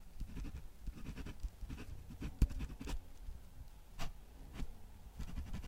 Writing with a pen
Writting with a pen on paper.
OWI, Pen, Office, writing, paper